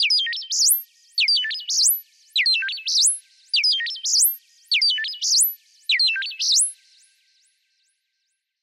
Pychopath Sound
sound, fx, noise, techno